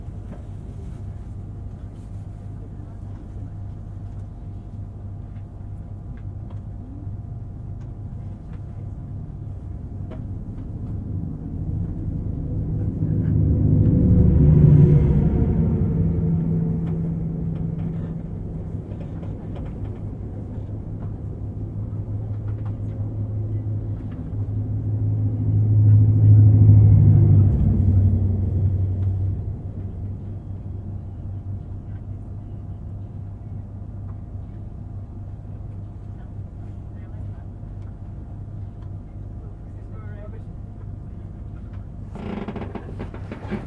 Two high speed trains passing - recorded from inside the train compartment.
railway, ambience, field-recording